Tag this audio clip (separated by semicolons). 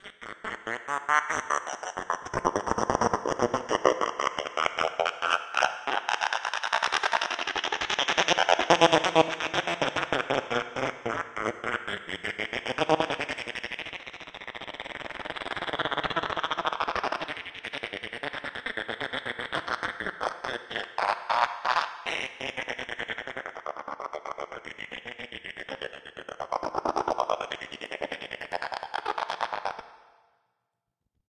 monophonic; 8; scary; monster; creepy; super; voice; creature; sound